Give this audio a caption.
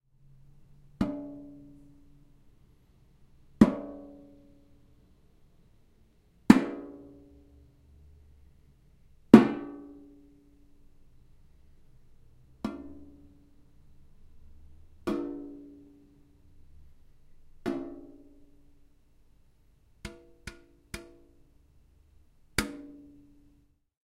Metal sound 10 (flicking cookie jar)
A metal cookie jar being flicked with fingernails.
cookie, jar, flicking, flick, cookiejar, Metal, hit